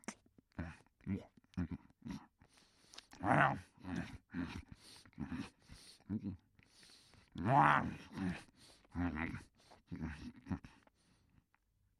09 eet geluiden
Eating sounds of a giant or weird sort of beast.
sfx, vocal